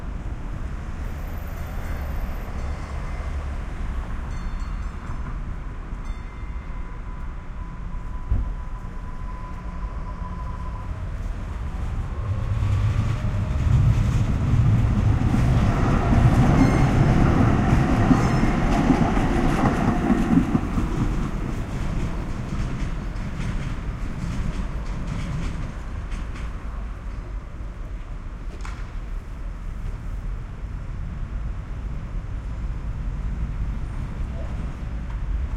train-by2
Street noise of Coolidge Corner with a T train passing by.Recorded using 2 omni's spaced 1 foot apart.